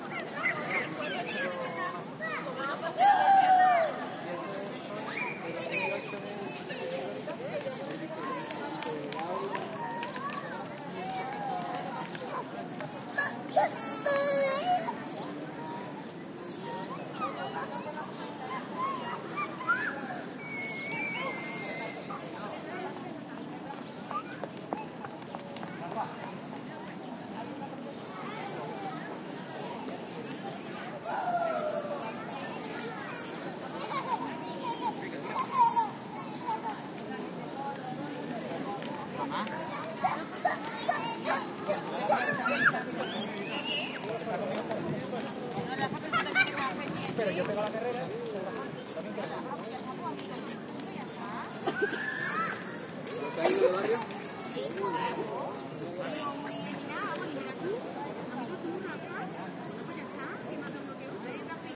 voices of kids playing at Plaza Nueva, Seville. Some comments from parents can also be heard. Edirol R09 built-in mics